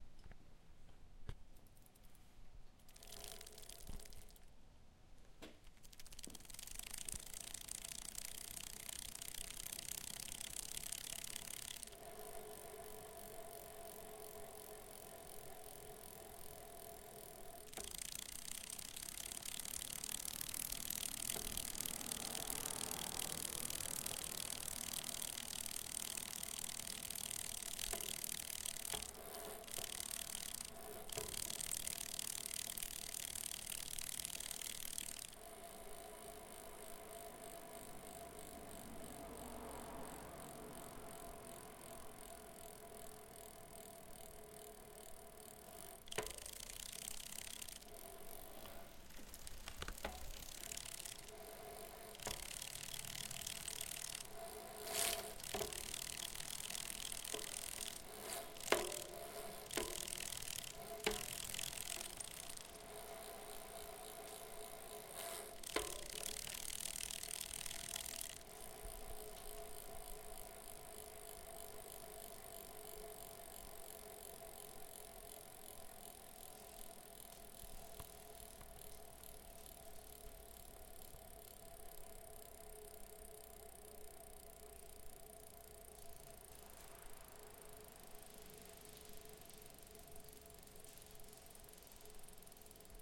Bicycle ride and coast
Bicycle pedaling and coasting. This sound is from my Gary Fisher Marlin mountain bike before it was stolen. Serial WW1729041.